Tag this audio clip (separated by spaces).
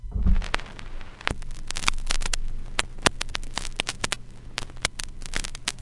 LP record surface-noise turntable vintage vinyl